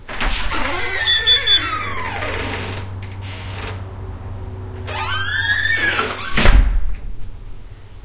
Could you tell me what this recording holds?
Front door opening and closing (with chime)
Me pulling open and pushing the front door shut in house. The "beep beep beep" is the alarm system's chime.